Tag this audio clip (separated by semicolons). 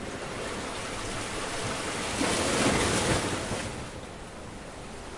recording; field-recording; waves